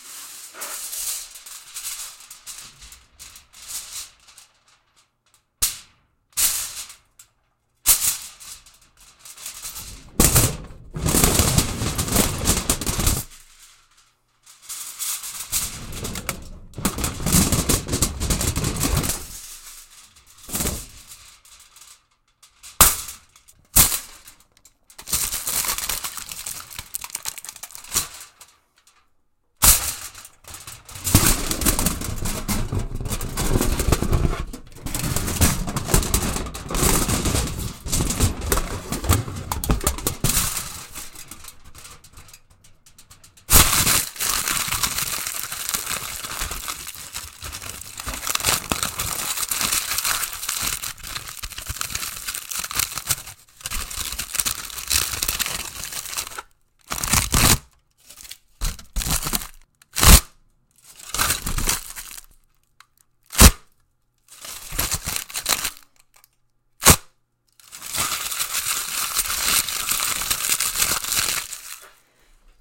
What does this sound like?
Running aluminum foil over the mic.
aluminum, foil, metal